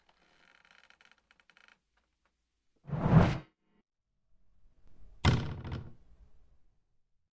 BC arrow shoot
arrow shot from bow. Made with squeaky floor, car passes, metal rings, and cabinet sounds